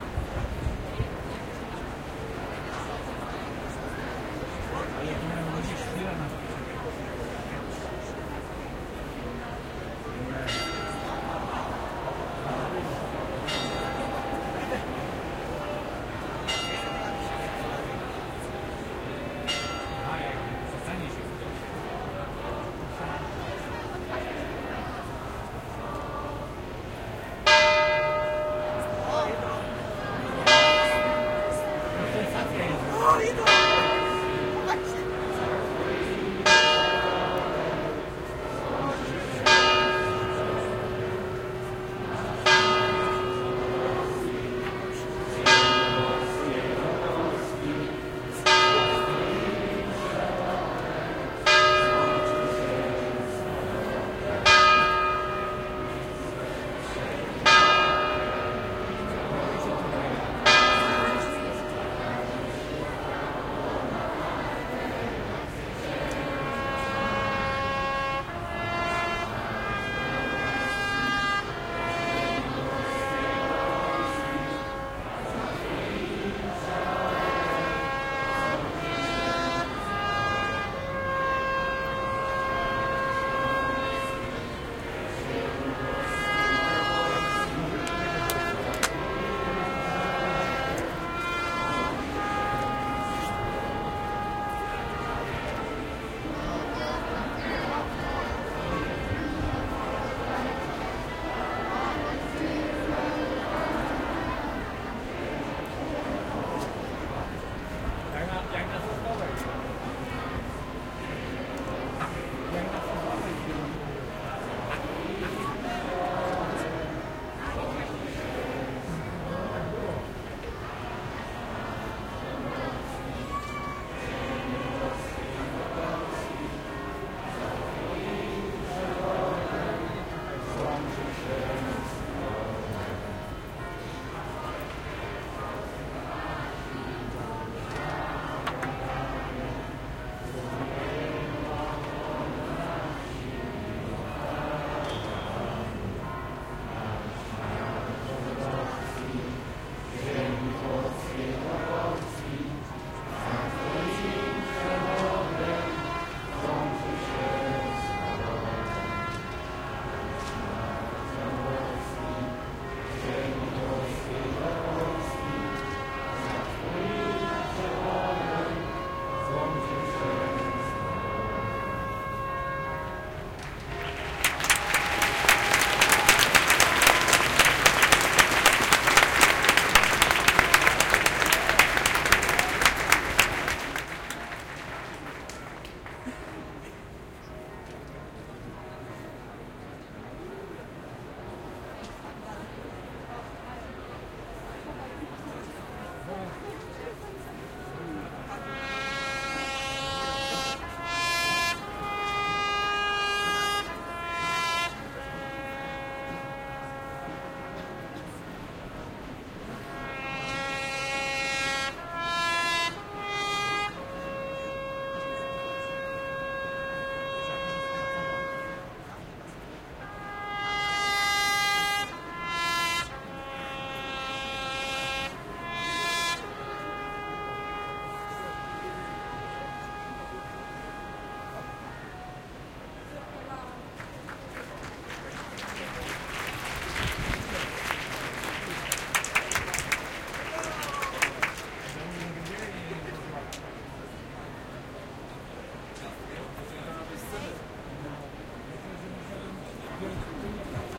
a symbolic recording taken on 11.11.2018 at 12.00 PM at Old Market Square in Poznan, Poland to immortalize the celebration of 100 years of Polish Independence
national, crowd, poland, music, voices, street